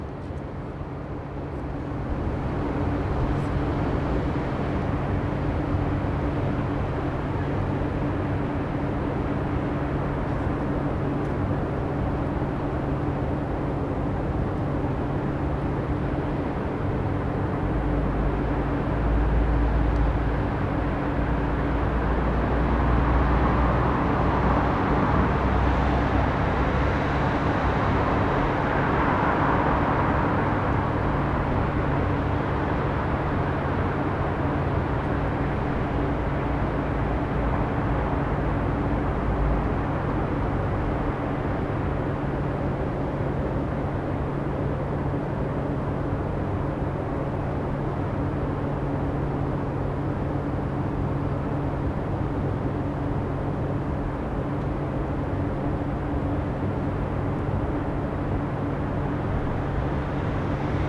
Building Rooftops Ambient
Recorded in mono on a building at 15 meters up of the ground.
With Seenheiser MKH60 & Nagra Ares BB+.
air, Rooftops, ambient, shaft, vent, car, ventilation, town